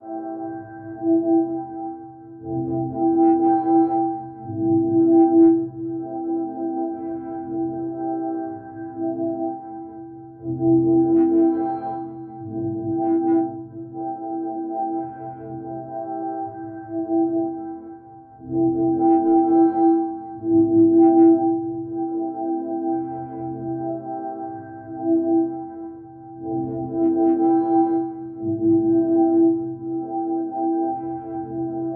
born free
Undulating Synth pad processed through Metasynth.
ElectronicAmbientDrone, Synth, Pad